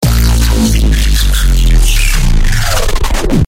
Falling bass made in FL12.